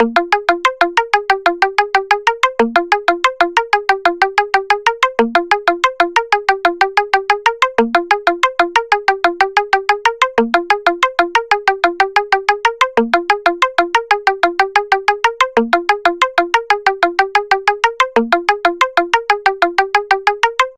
Trance Pluck 3 (Dry Signal) [185 BPM}
Another one made in Serum But It's kinda dry
Signal, Studios, Headphones, 6x6, DJ